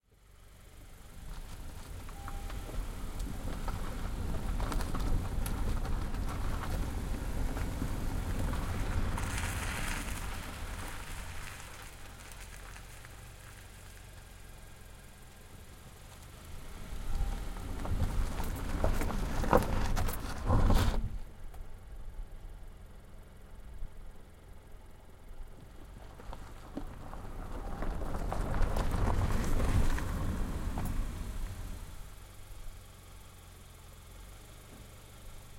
Car Tires Start and Stop on Gravel Shoulder
Mic outside of car near tire as driver stars and stops on a gravelly shoulder/breakdown lane.
car, gravel, brake, tires, road, start, tire, stop